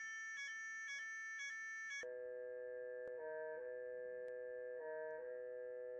MATIAS Manon 2015 2016 son2
alarm; emergency; fire; stress